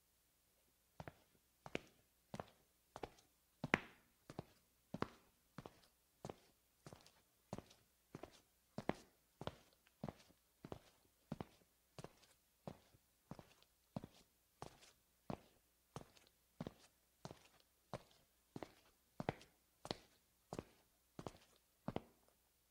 Footsteps, Tile, Male Sneakers, Slow Pace
Sneakers on tile, slow pace